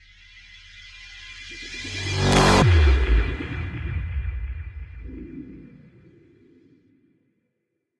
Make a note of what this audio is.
Trailer hit 9
Industrial Sounds M/S Recording --> The recorded audio is processed in logic by using different FX like (reverse/reverb/delay/all kinds of phasing stuff)
Enjoy!
cinema, effect, garage, woosh, hits, fx, effects, film, sound, boom, design